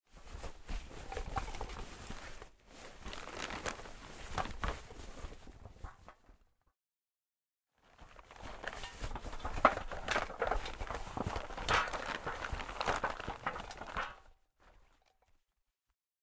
Moving garbage
Studio recording of someone searching and digging through random objects including plastic, metallic and paper stuff inside a thick fabric sack.
Recorded and performed by students of the Animation and Video Games career from the National School of Arts of Uruguay during the Sound Design Workshop.
debris, dirt, foley, garbage, gear, metal, movement, moving, plastic, rattle, rubbish, rustle, search